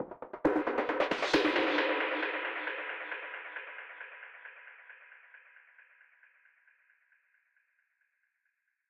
loop filtrator2
filtered percussion loop with fx